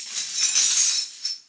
Digging Coins #2
Variation of the first digging coins sound.
broken, clang, coins, glass